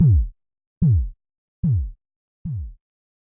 sound created from a virtual 808 with lots of filters and compression
kick, drum, chile, mismo, drums, reaper, porn, yo, pow, 909, sample, percussion, yomismo, cheap, processed, pwkick, 808, synthetic